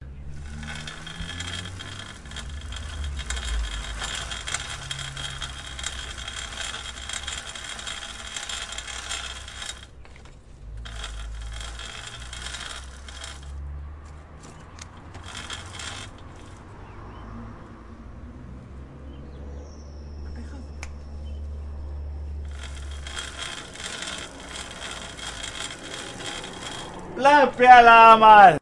Spinning of Bicycle Chain